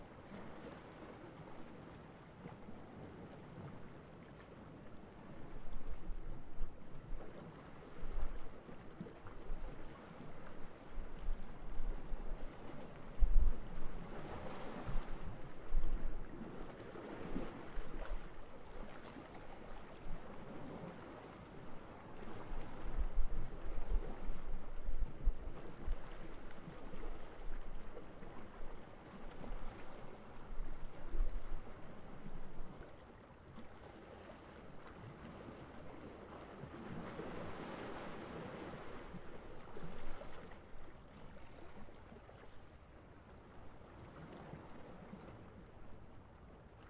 recorded at Baia del Rogiolo, Livorno Italy, over the gravel at 1mt from sea waves
ambience, mediterranean, ocean, soundscape, water